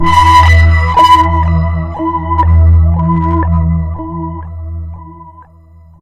THE REAL VIRUS 14 - HEAVYPULZLEAD - E2
A pulsating sound, heavily distorted also, suitable as lead sound. All done on my Virus TI. Sequencing done within Cubase 5, audio editing within Wavelab 6.
pulsating, multisample, distorted, lead